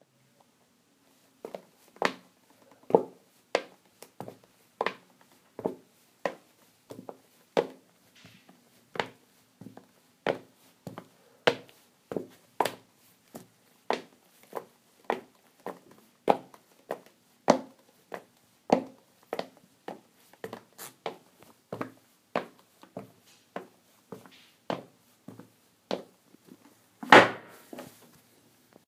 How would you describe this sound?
Pasos en madera
By, Steps, Wooden